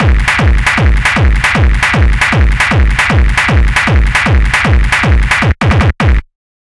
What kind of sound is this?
xKicks - Hummer
There are plenty of new xKicks still sitting on my computer here… and i mean tens of thousands of now-HQ distorted kicks just waiting to be released for free.
bass-drum, drum, hardcore, kick, distortion, hardstyle, distorted, techno, kickdrum, bass, bassdrum, gabber, beat, hard